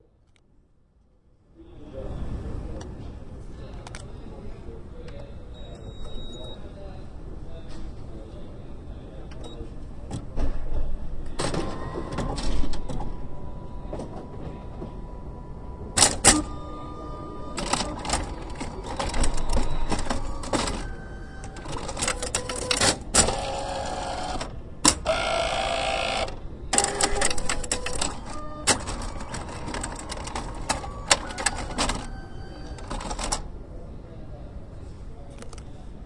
Printer Startup
Big multifunctional printer hub is recorded starting with marantz pmd661 internal stereopair in the close proximity to paper loading bay
boot, marantz, printer, recording, startup, stereo